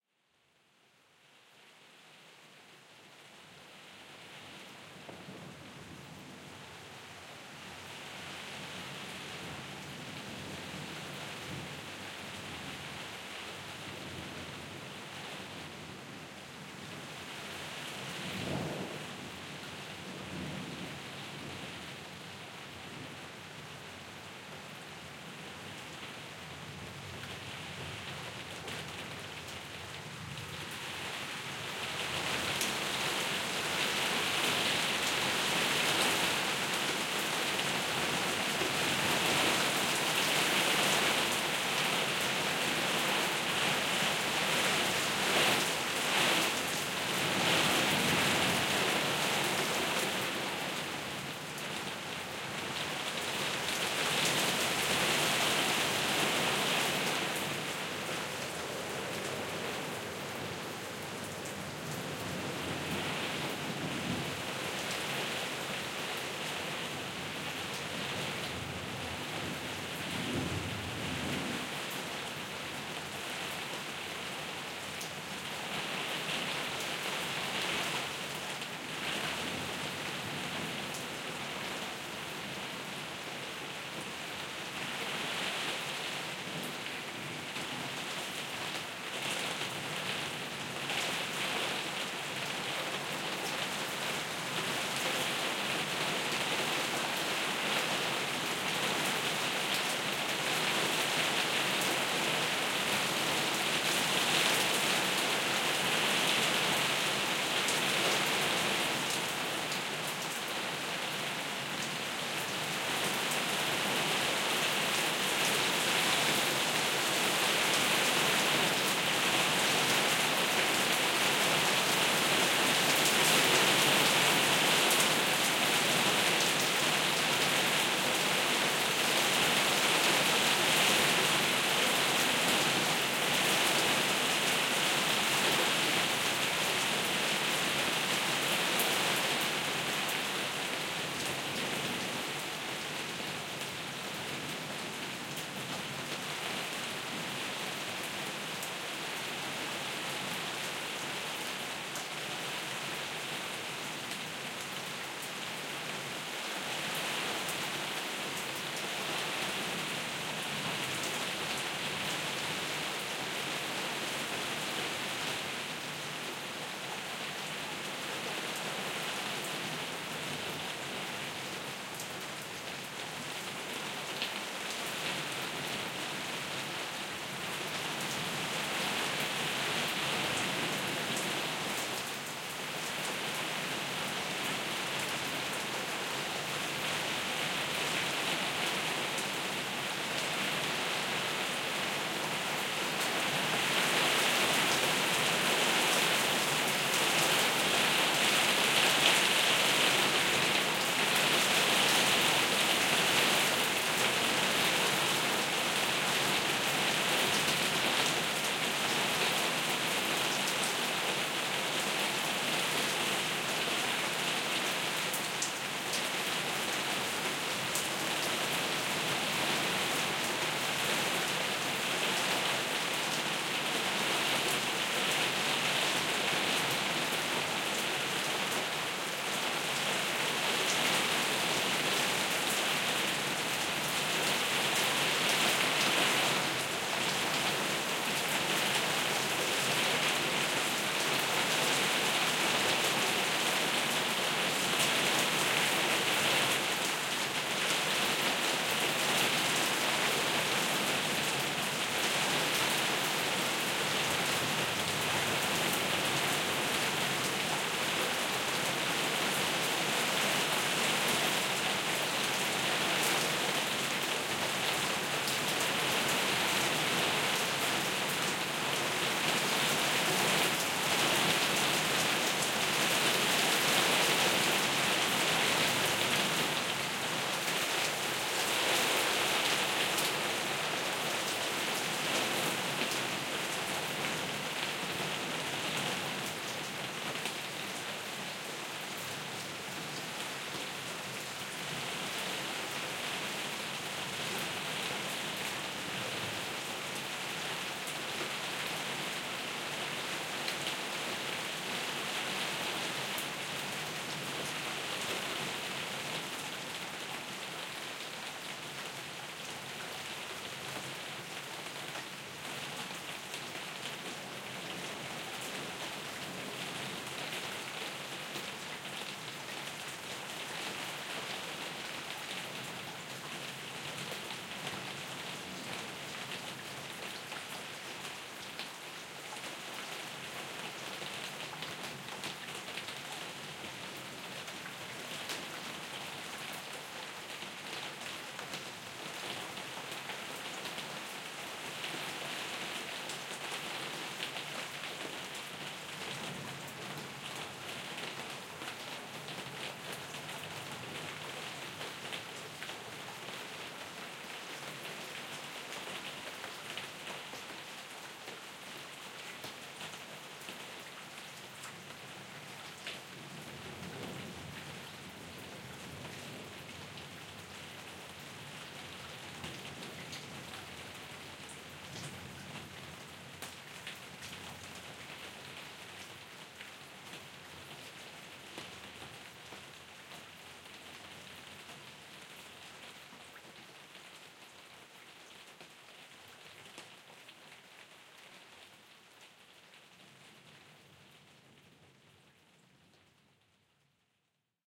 Canopy Rain
Rainstorm recorded under a plastic canopy.